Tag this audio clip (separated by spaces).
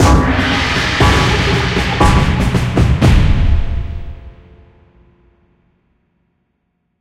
epic sfx video-game indiegamedev games fantasy win scary complete jingle indiedev rpg fear celebrate frightening gamedev gamedeveloping gaming terrifying frightful horror videogames game